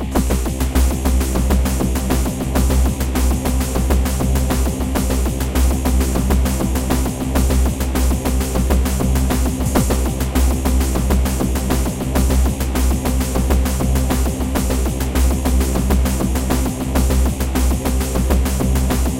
hope u like it did it on ableton live the beat and tune :D